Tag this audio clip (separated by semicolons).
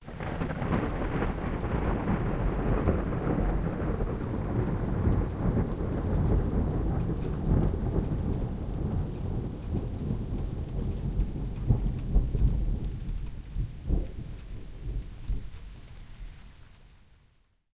storm; thunder